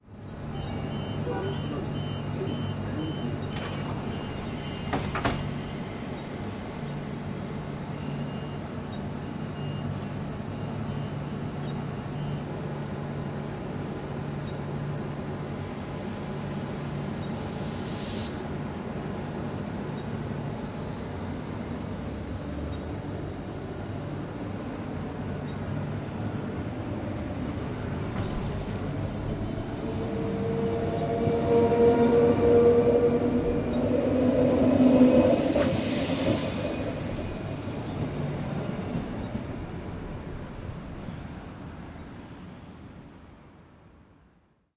train depart slow 1
The sound of a typical EMU train slowly departing from a station, including the closing of the doors. Recorded with the Zoom H6 XY Module.
depart, electric, emu, hissing, motor, rail, railway, slow, station, train, whine